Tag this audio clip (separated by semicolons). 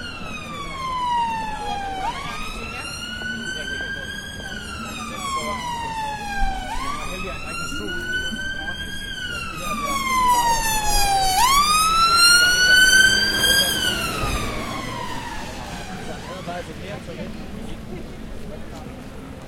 ambient; city; field-recording